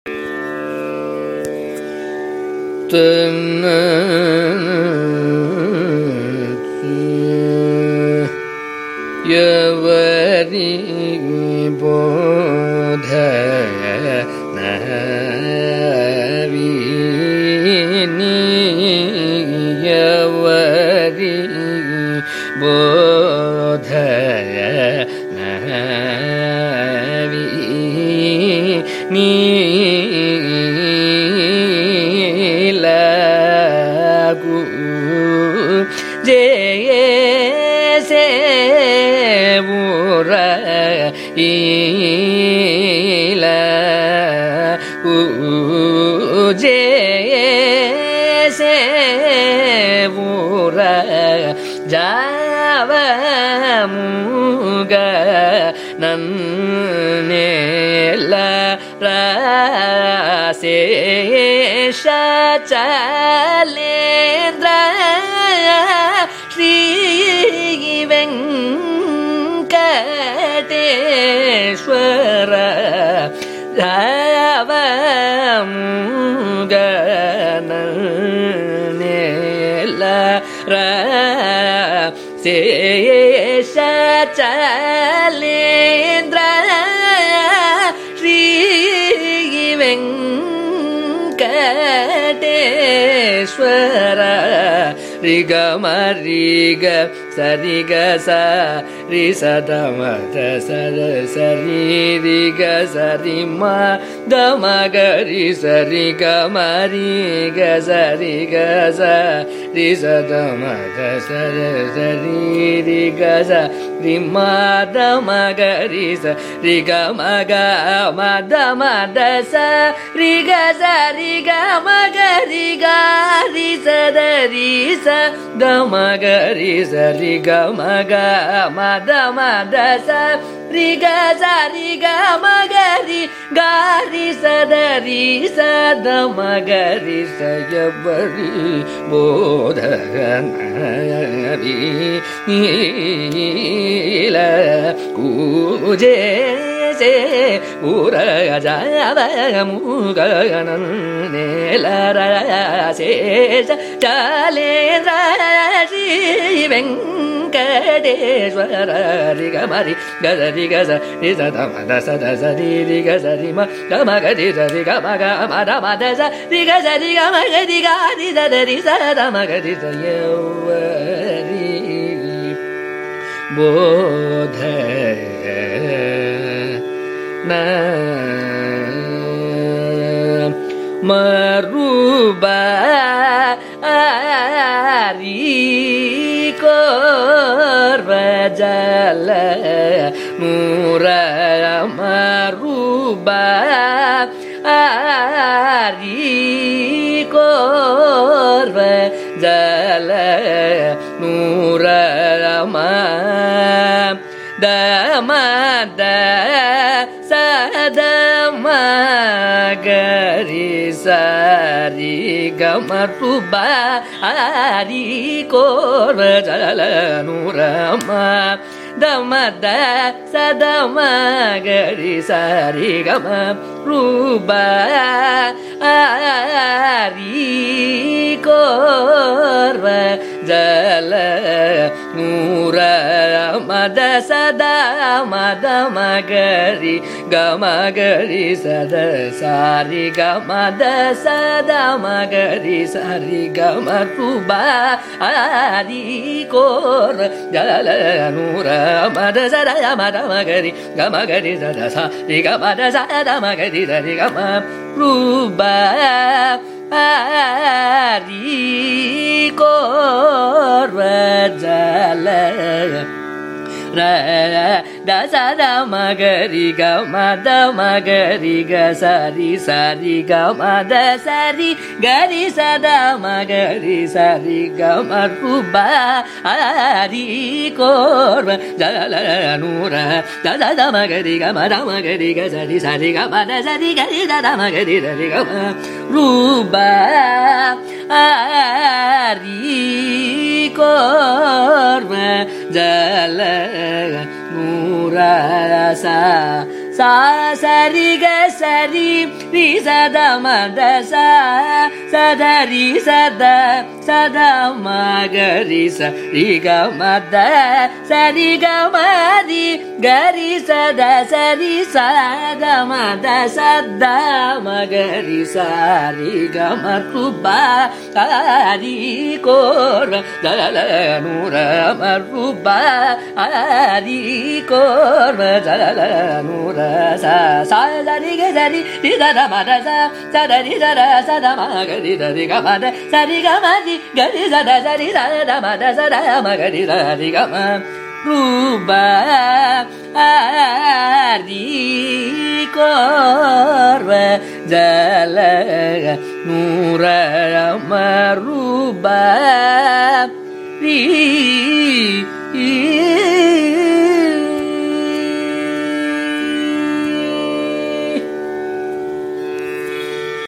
Carnatic varnam by Ramakrishnamurthy in Abhogi raaga
Varnam is a compositional form of Carnatic music, rich in melodic nuances. This is a recording of a varnam, titled Evvari Bodhana Vini, composed by Patnam Subramania Iyer in Abhogi raaga, set to Adi taala. It is sung by Ramakrishnamurthy, a young Carnatic vocalist from Chennai, India.
carnatic, carnatic-varnam-dataset, compmusic, iit-madras, music, varnam